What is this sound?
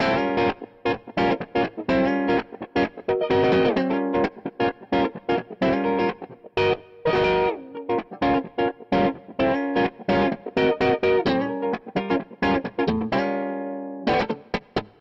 House Guitar Loop 1
Funky guitar loop